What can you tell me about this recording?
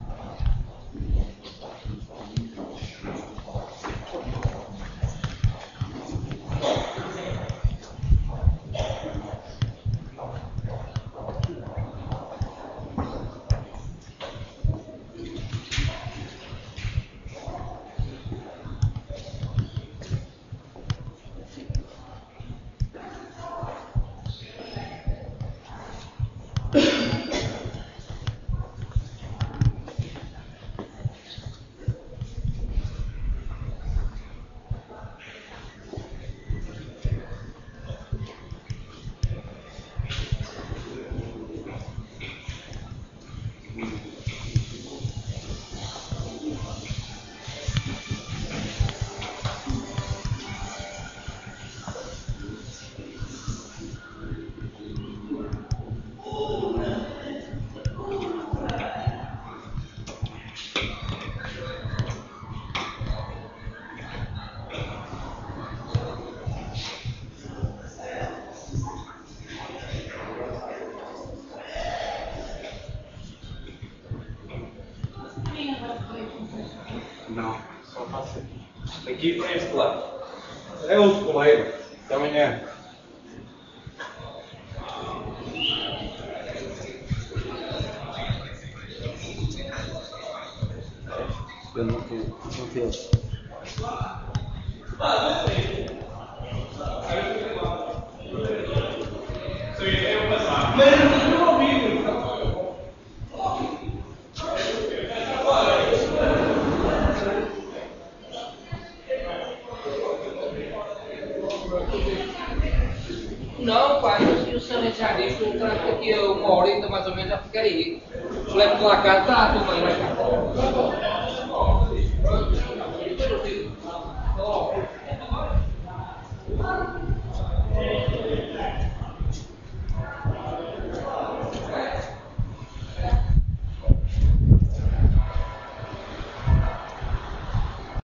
walking centro comercial caxinas
walking in a shoping caxinas
caxinas, shoping